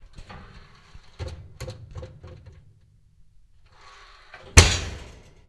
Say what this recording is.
stove open close
open and close a stove
kitchen, stove, open, close